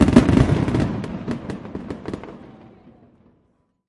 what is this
Son de feux d’artifices. Son enregistré avec un ZOOM H4N Pro et une bonnette Rycote Mini Wind Screen.
Sound of fireworks. Sound recorded with a ZOOM H4N Pro and a Rycote Mini Wind Screen.
firework, firecrackers, boom, rocket, explosion, rockets, fire, fire-crackers, fire-works, fireworks, bomb